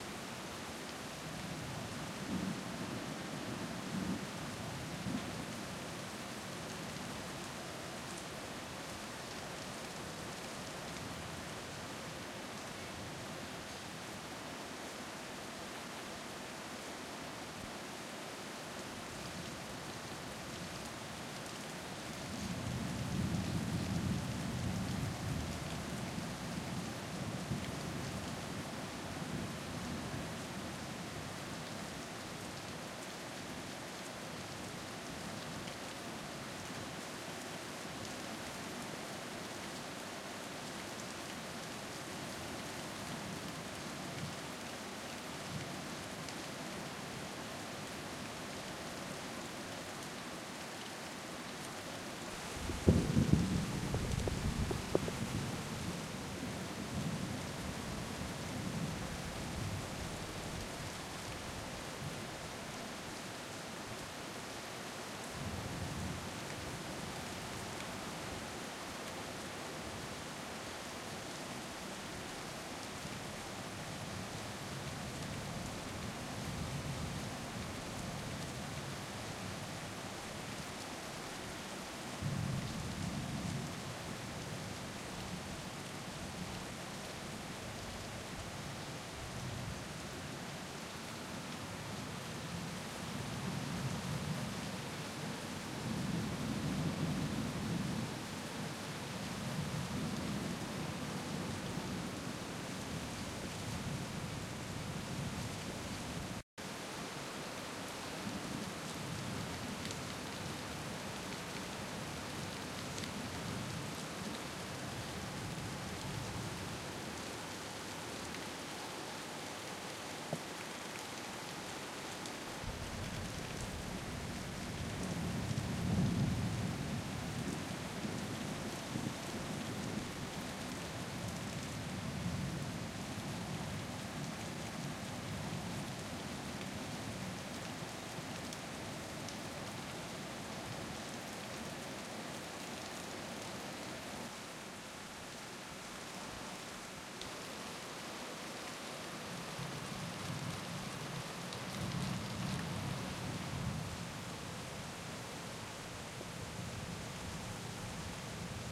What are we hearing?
recording of light rain
storm, rain